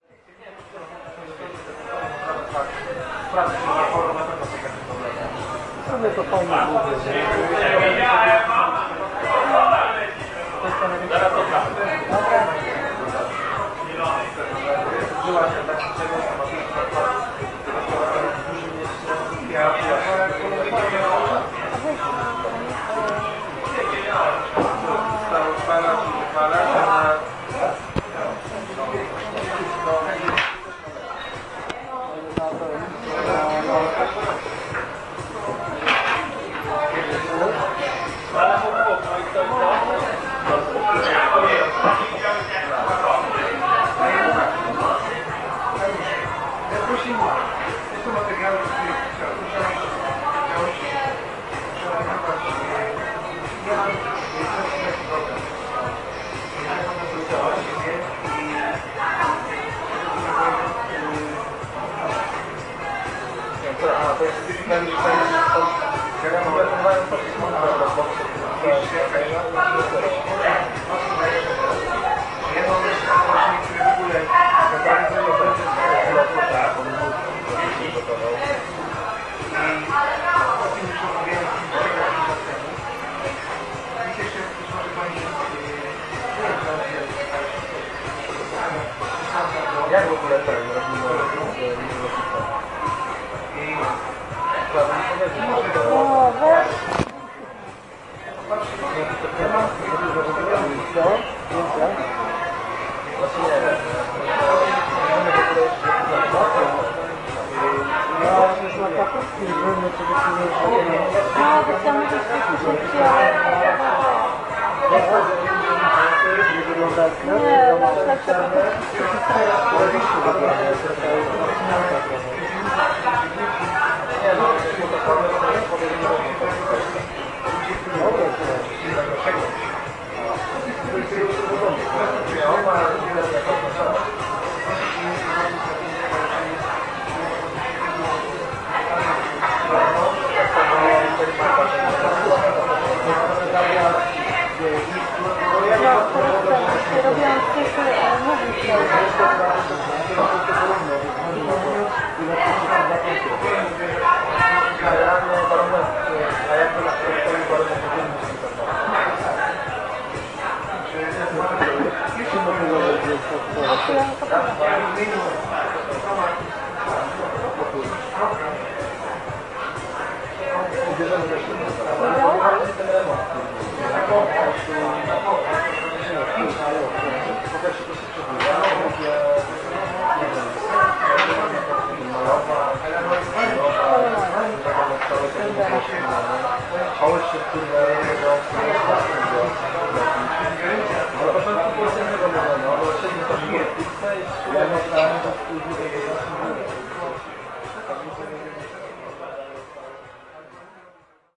kisielice club 240910
24.09.2010: about 22.00. the ambience of Kisielice club on Taczaka street in the center of Poznan.